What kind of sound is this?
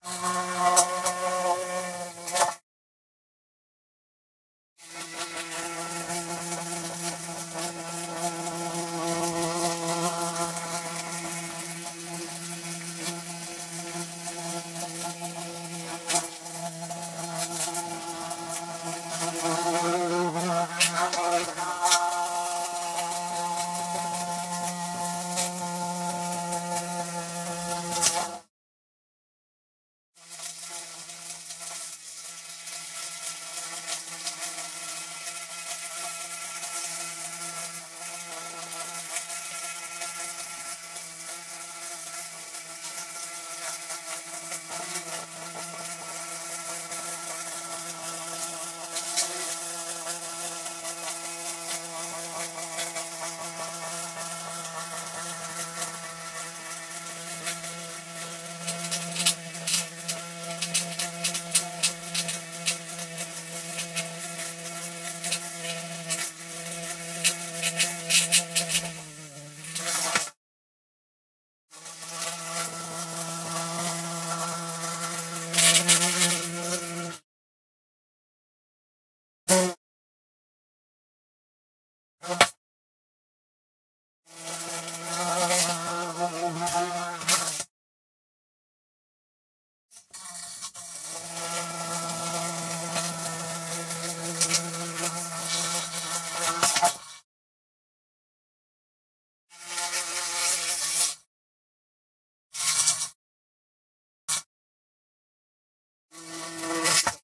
Some bumblebees catch in a glasbox and record the bumble sound inside with a (Panasonic) Ramsa S3 Microphone
BUMBLEBEE, BEES, BEE, FLY